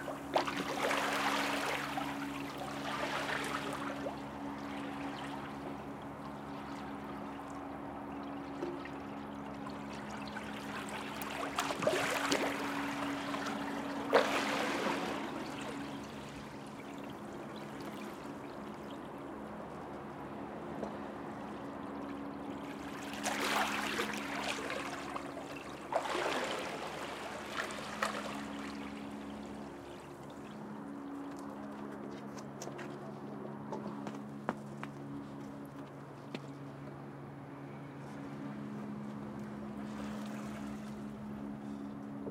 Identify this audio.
Ambiente - puerto, olas en el pantalan
Environment from a sea dock at night
MONO reccorded with Sennheiser 416 and Fostex FR2
dock, waves, night, sea